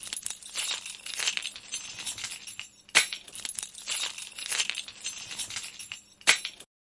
handling keys abruptly because in a hurry to open door keys falling on the ground and picking them up
hurry, field-recording, keys, key-ring